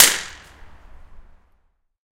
Concrete Wall Outside 1
This is a free recording of a concrete wall outside of masmo subway station :)
Wall, Masmo, Outside, Concrete